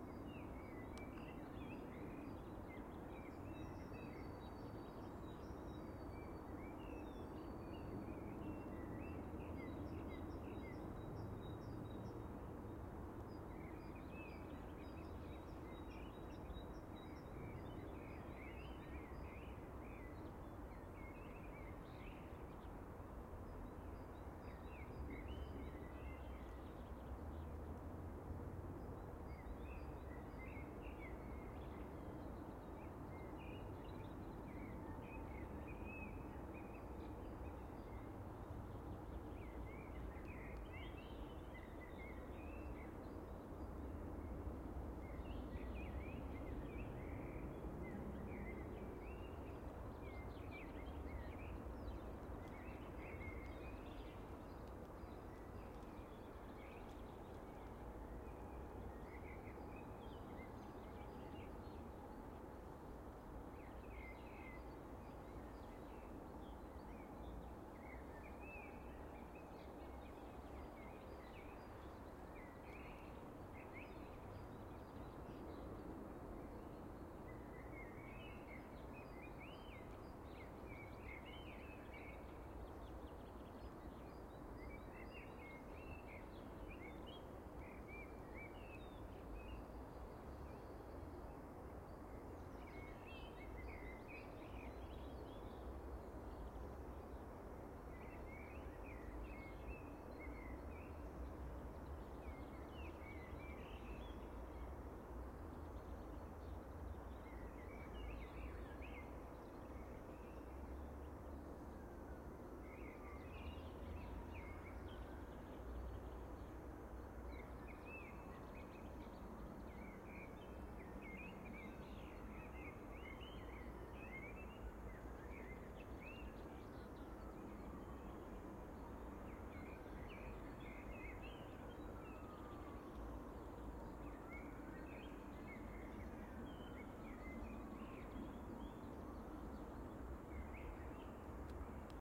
Very distant traffic and birds. Spring.